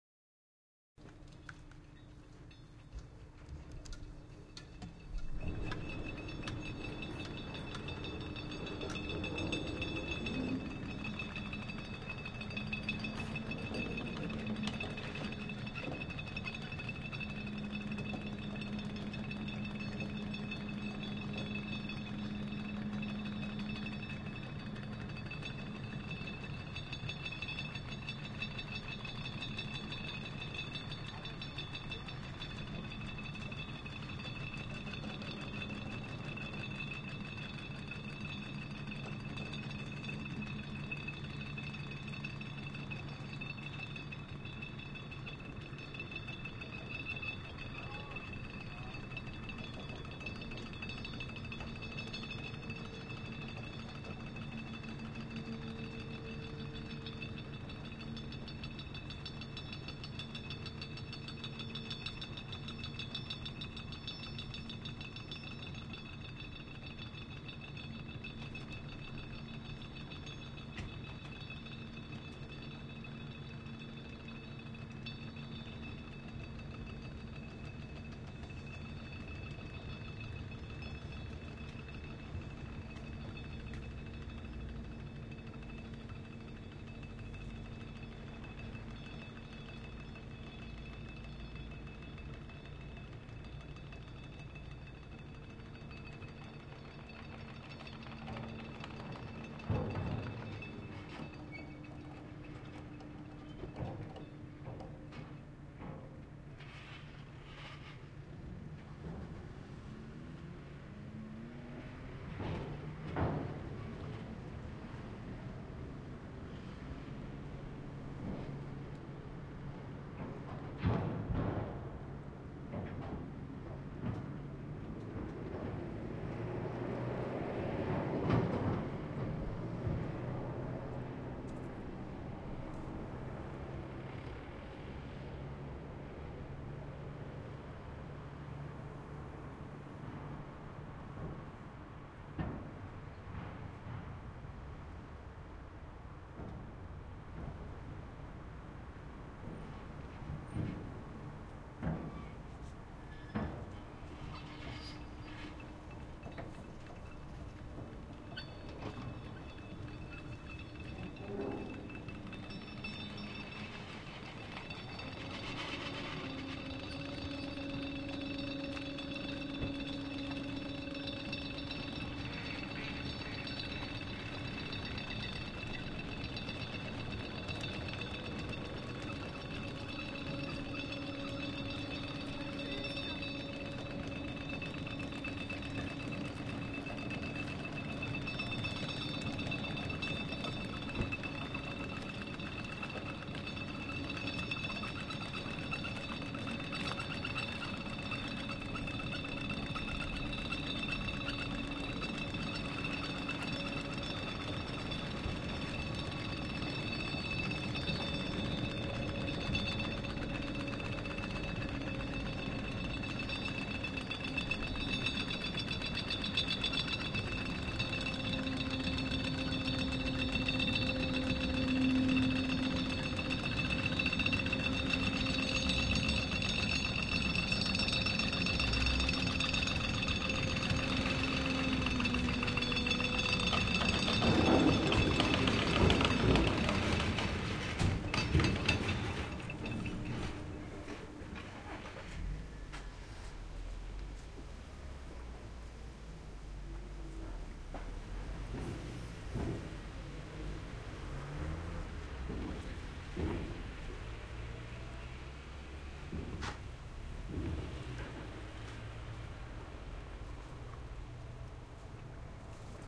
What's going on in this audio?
This is a ferry crossing a river in Germany.